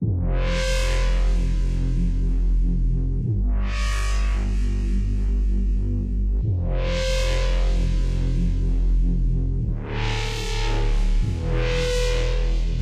Hypo-DistBass-150bpm
Distorted biting bassline loop.
At your own risk :)
breakbeat, boom, loop, dubstep, effect, bass, break, dnb, electro, low, 150bpm, distorted